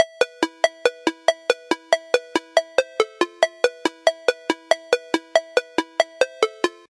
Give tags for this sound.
17
2free
3
alert
arp
cell
cell-phone
mojo-mills
mojomills
mono
phone
ring-alert
ring-tone
tone

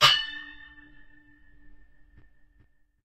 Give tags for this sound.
ancient
fighter
knight
slash
soldier
sword
sword-slash

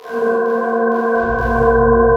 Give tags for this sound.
syth
unsettling
reverb
sythetic
serious
hollow
creepy
scary
ominous

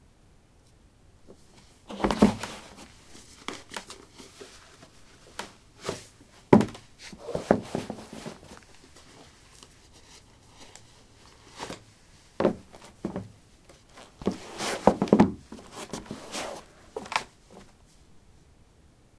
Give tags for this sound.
footsteps
footstep

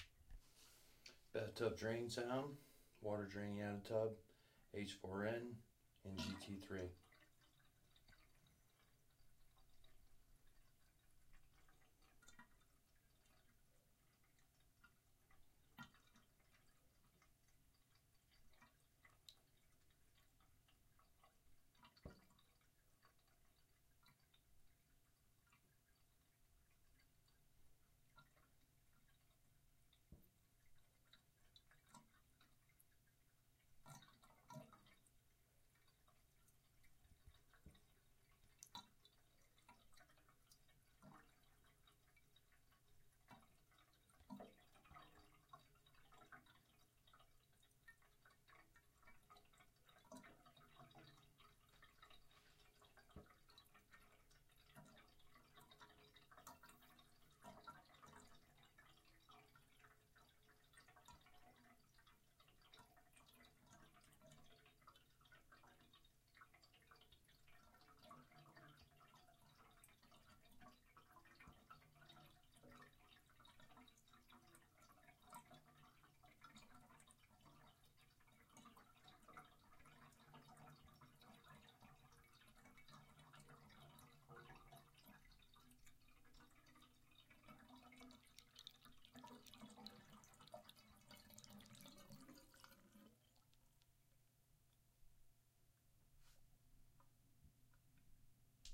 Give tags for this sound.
bath
faucet
running
water

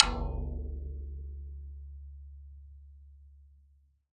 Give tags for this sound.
1-shot
drum
multisample
tom
velocity

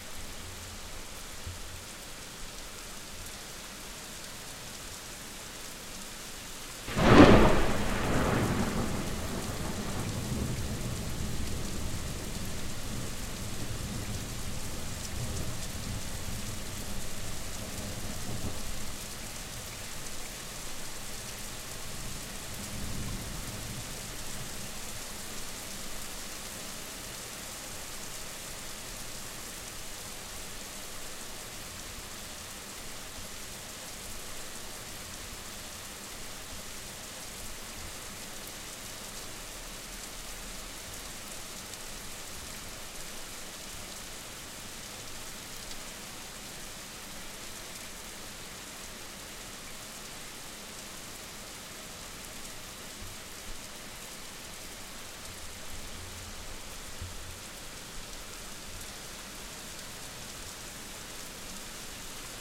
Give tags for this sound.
Field-recording
L
lightning
Naure
rrach
Thunder

